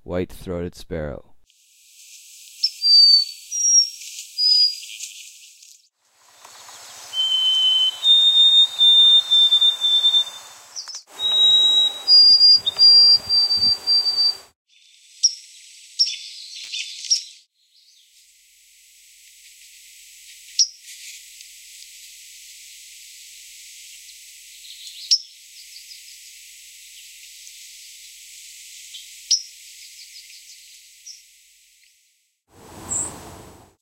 White-ThroatedSparrow1
There are a few recordings on this showing the different variations in the "Oh Canada-canada-canada" and "Old Sam Peabody-Peabody-Peabody" songs. There are also a few calls.